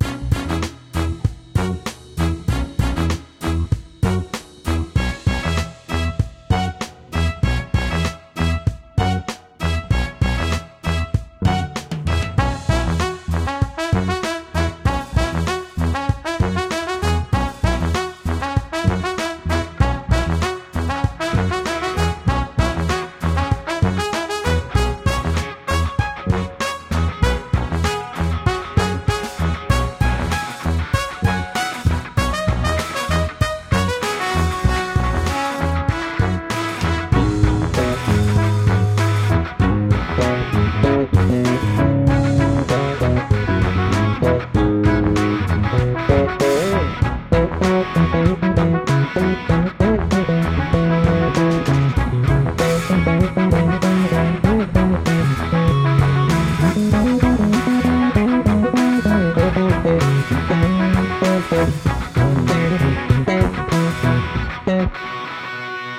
Funk Meister
A funky song with catchy instrumentation and even a bass solo.
Hifi, suitable for professional use.